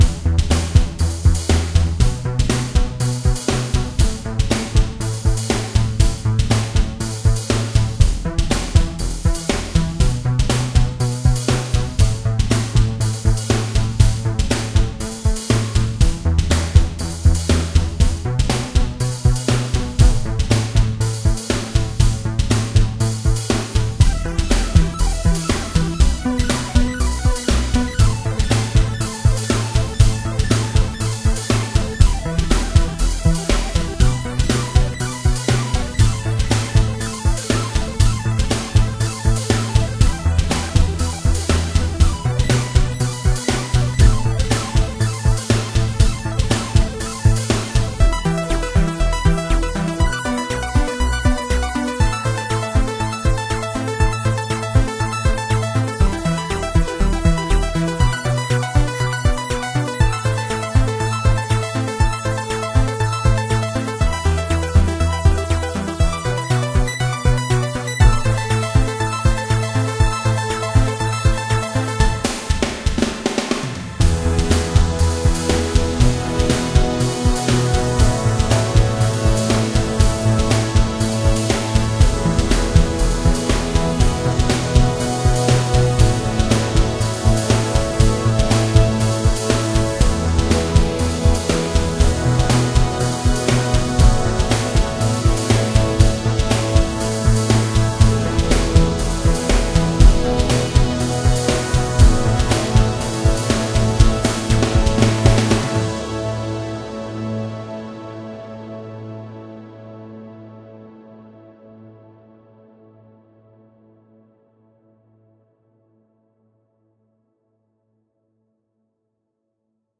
Happy 8bit Pixel Adenture
8bit, adventure, beat, boy, drums, fun, game, groovy, happy, loop, music, pixel, quantized, synth